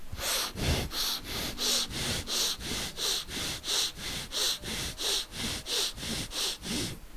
Respi Alter
gasp, respiraci, breath, alteraci, n, pant, alterada, breathe